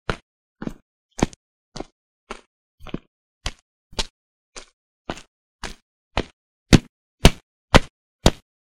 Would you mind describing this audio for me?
Stone Steps
The sound of walking on a concrete body. Enjoy!
ground, concrete, stone, walking, feet, steps, footsteps, walk